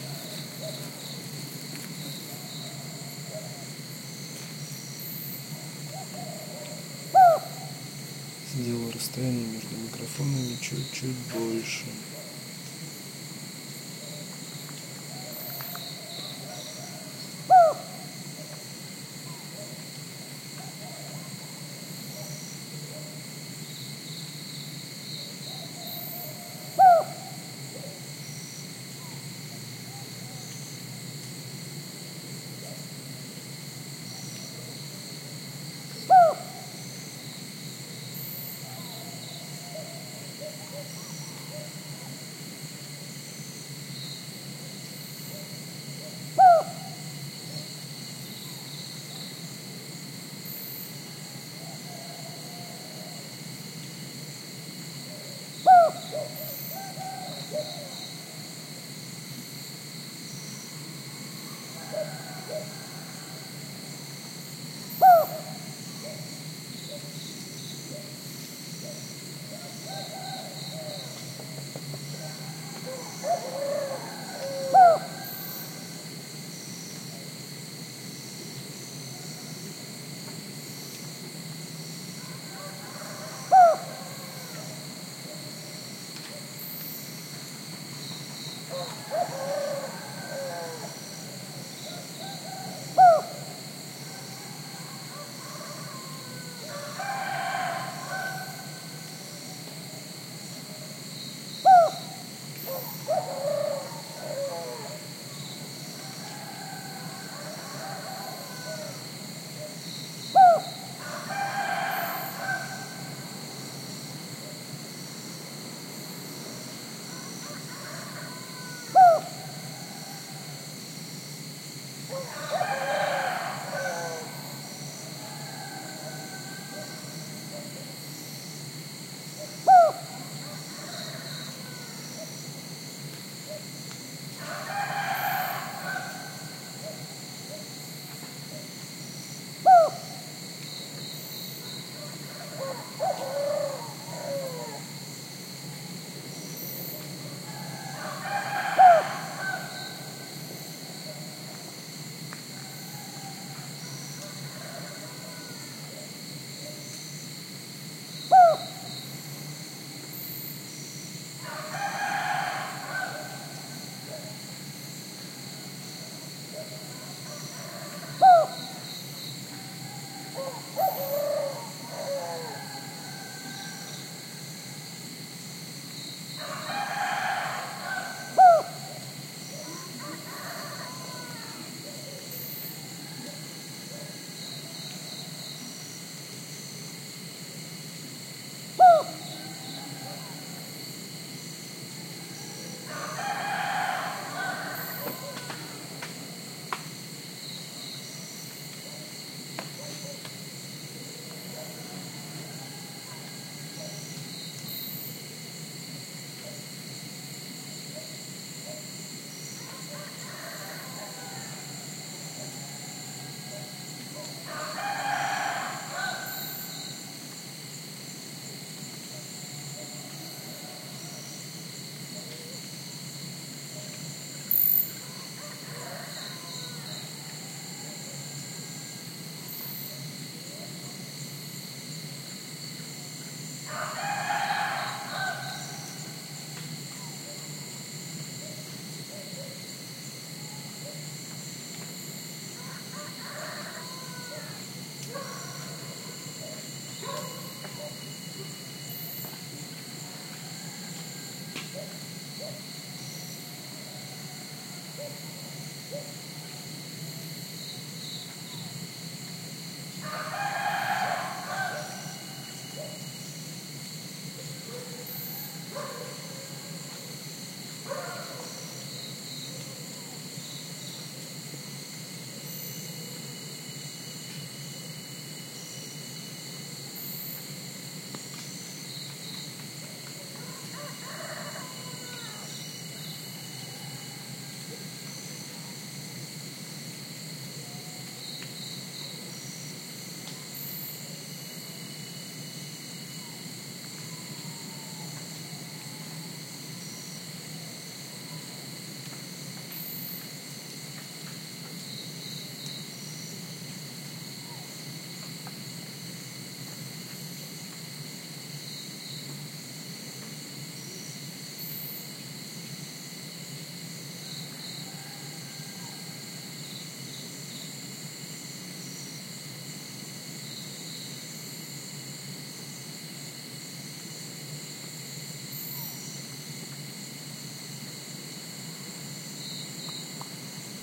Recorded in Chiangmai. Sound Devices 664, two Sanken CS-3e (cardioid) in ORTF. Some unknown bird (seems like cuckoo), cicadas.
ambience, ambient, atmosphere, cicada, cuckoo, field-recording, rooster, thailand